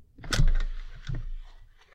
Take seatbelt off
Unplugging seatbelt inside car.